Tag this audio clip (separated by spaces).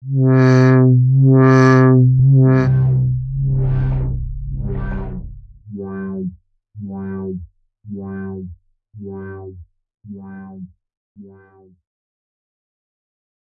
space texture samples cinematic evolving electronic dark pack horror vocal drone pads synth experimental atmosphere glitch industrial soundscape ambient loop granular artificial